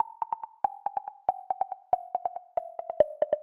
Galactic Percussive Synth
BPM, Beep, Synth, 140, Perc